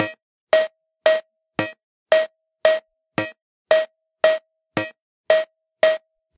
A loop of the waltz rhythm from a Yamaha PSS-130 toy keyboard. Recorded at default tempo with a CAD GXL1200 condenser mic.